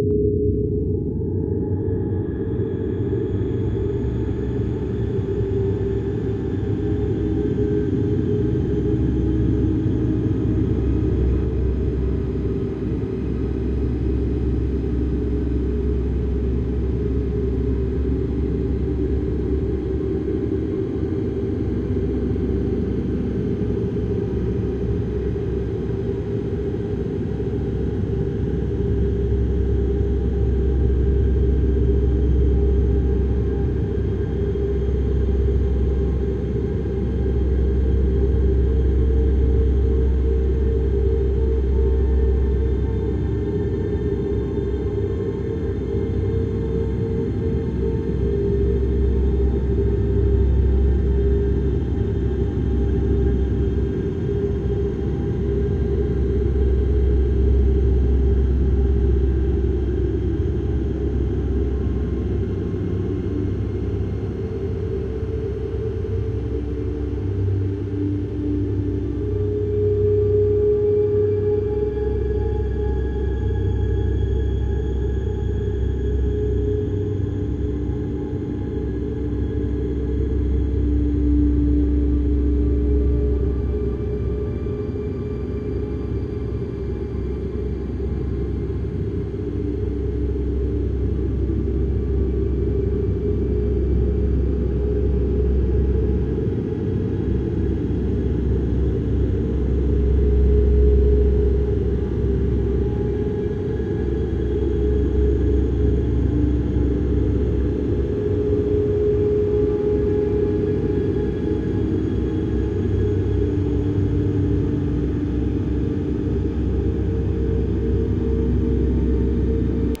horror atmosphere background
Horror background atmosphere with gentle cinematic violin-like layer.